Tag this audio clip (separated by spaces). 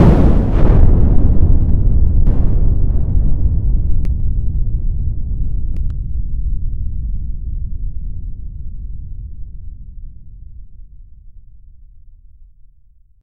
synthesized synthetic